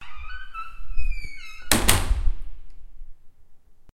PUERTA BLINDADA CIERRA
reinforced door closing
Una puerta blindada se cierra con un breve chirrido al principio